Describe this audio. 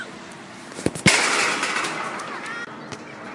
MySound GWECH DPhotography
Photo, Loud, roomy